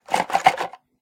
Box-Small-Cardboard-Shaken-Top Off-31
This is the sound of a small cardboard box that has a few odds and ends in it being shaken. In this particular sound the top of the box has been removed giving it a different resonance.
Shook, Cardboard, Jiggled, Box, Shaken, Container